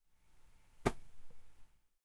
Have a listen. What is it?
Blanket Movement 2
A down doona/duvet being rustled. Stereo Zoom h4n recording.
blanket, cloth, clothes, clothing, doona, down, duvet, fabric, foley, h4n, material, movement, moving, pillow, rustle, rustling, sheet, sheets, shirt, stereo, swish, textile, zoom, zoom-h4n